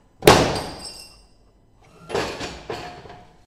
mono field recording made using a homemade mic
in a machine shop, metallic bang